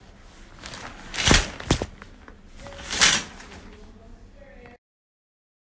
Sound 11 - shower curtain
curtain, metal, shower